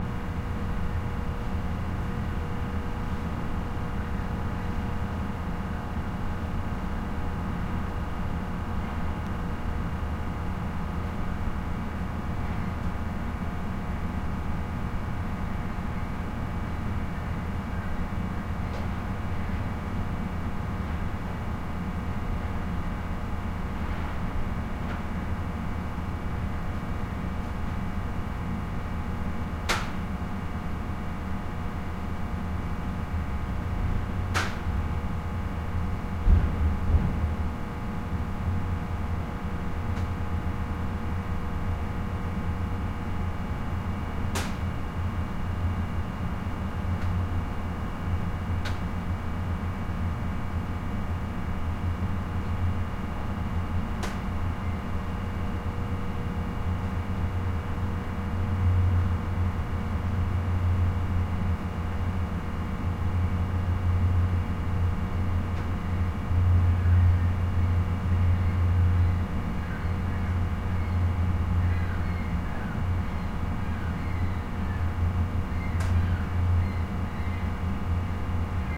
room tone warehouse heavy hum1
warehouse, heavy, room, hum, tone